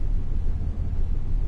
The sound of a car engine idling I grabbed from an old video I recorded. It didn't perfectly loop so I copied the sound, reversed it, and put it after the original sound (making a ping pong effect).